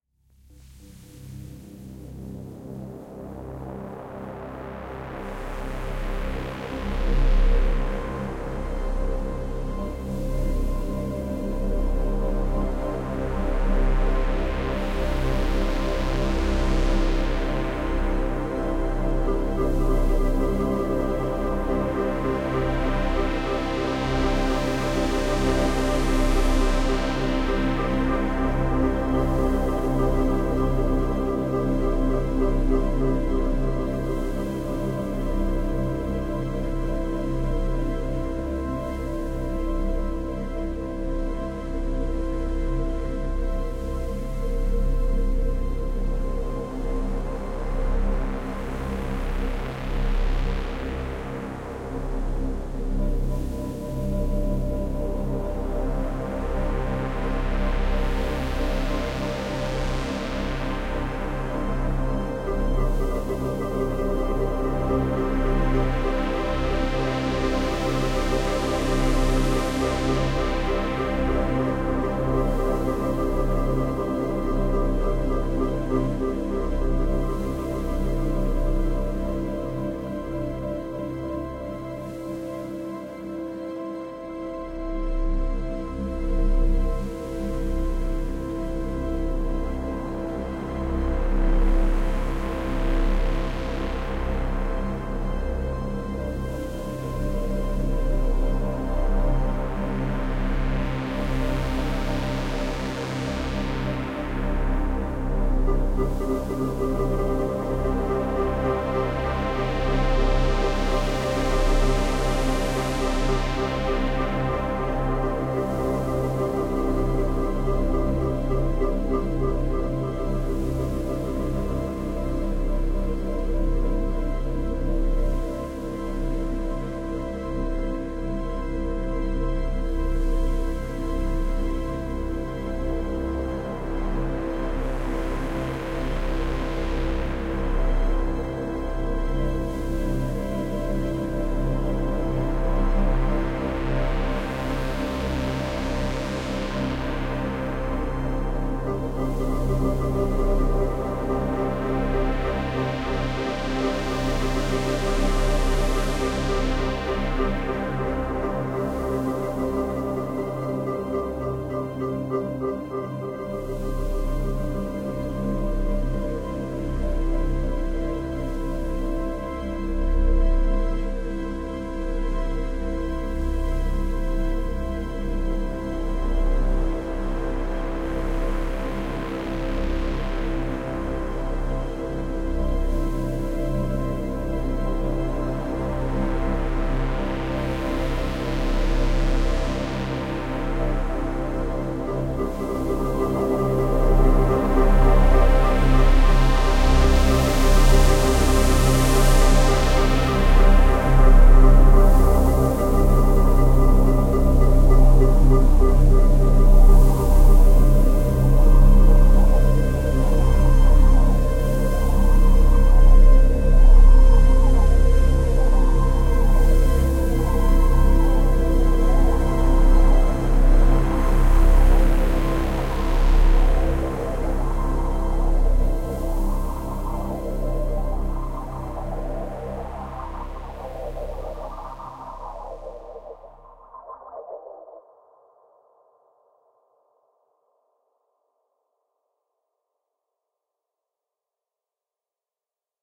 Space Ambient Voyage
Slow, yet powerful, wandering through the space
synth, sci-fi, laser, stars, effect, drone